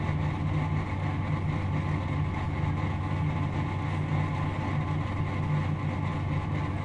Woodman's grocery store ambiance 1
I think this was recorded in the dairy section.
A different grocery store than the one heard in "field recording of a Pick 'n Save grocery store" (12/04/2016).
Recorded 10/03/2015.
ambience, ambient, field-recording, grocery, mechanical, noise, urban